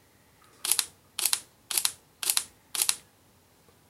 Sony NEX-7 contimuous shutter 1
sound of shutter release in continuous mode, longer exposure time.